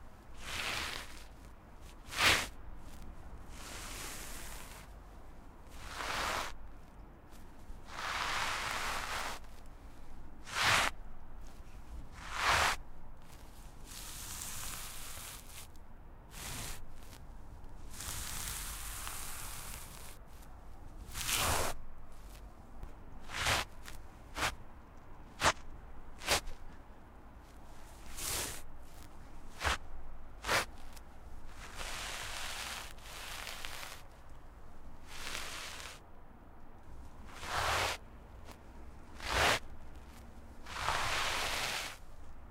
Dragging a pair of mittens against a brick wall. Recorded with a Sennheiser MKH 416.